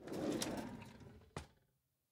Cutlery Drawer Closing 01
Cutlery drawer being closed. Recorded using a Sennheiser MKH416 and a Sound Devices 552.